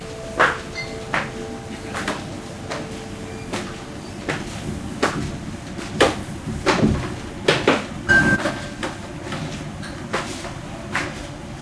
stairs thongs
Not terribly inspiring but a noise you hear in Australia quite often - I think in UK/USA the they may be called flip-flops?
Part of the accidental recording and sound is me walking up a timber tread spiral staircase that happened to be adjacent to the camcorder left on at floor level.
There is a small single chime approx. two thirds the way through which adds a bit of humanity to the take.
chime, flip-flops, shoes, thongs